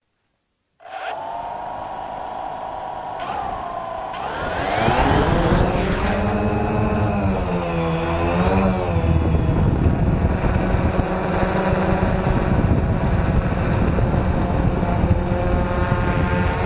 Phantom Quadcopter takes off
Phantom Quadcopter - motors start and rapid lift off.
Flight, take-off, Phantom, Motors, Quadcopter